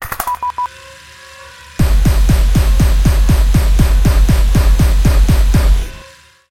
Heavy Mounted Assault Plasma Gun
Gun, Combat, Minigun, Firearm, War, Plasma, Laser, Battle, Shooting, Weapon